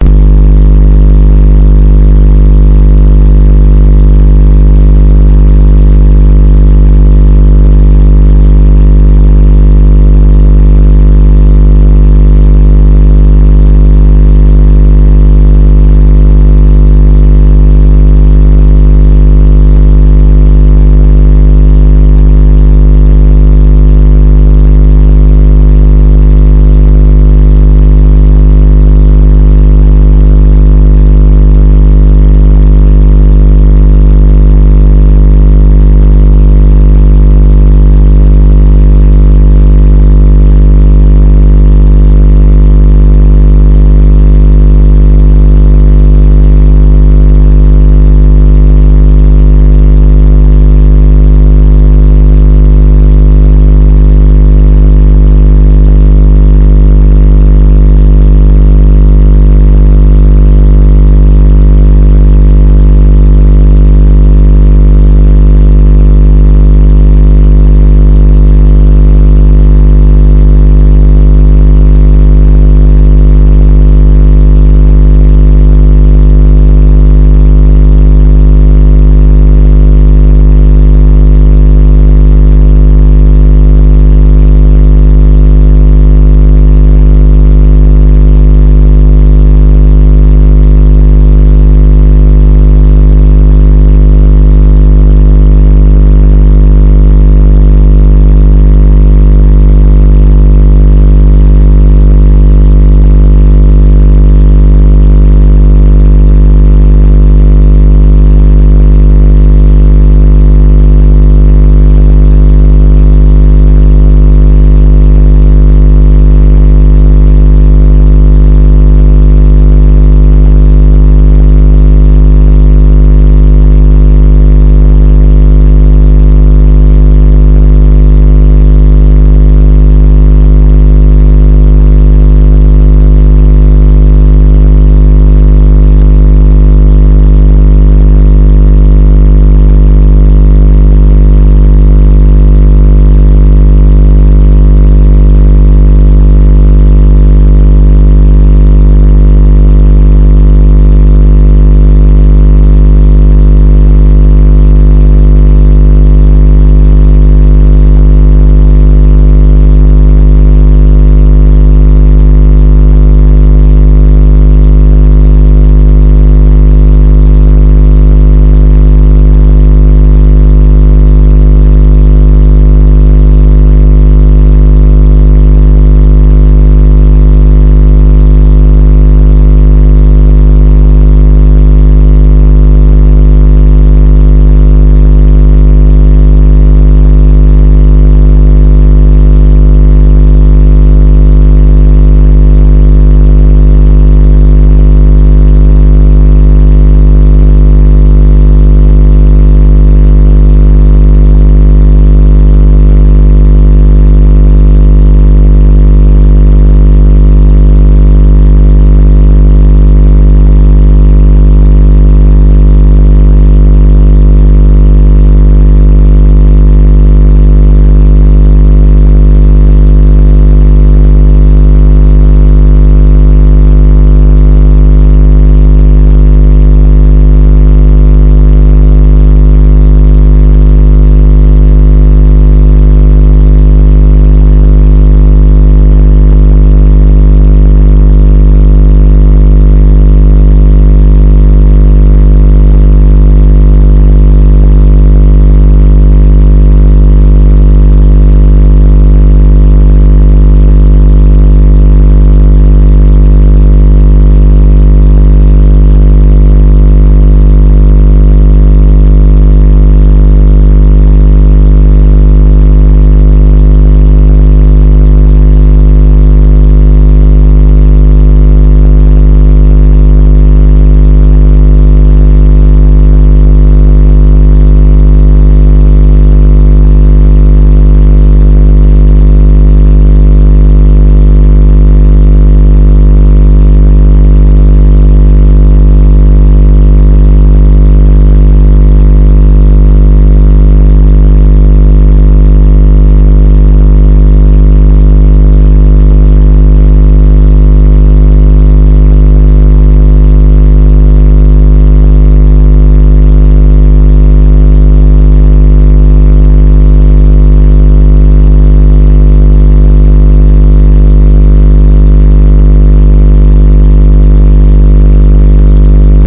ECU-(A-XX)138+
Engine Control Unit ECU UTV ATV ISO Synchronous Fraser Lens Beam Battery Jitter Atmosphere Calculator Analogical Rheology Paradigm Dual Carb Process Wifi Hysteresis Mirror Field Path Trail Channel Real Time
Battery, Trail, UTV, Time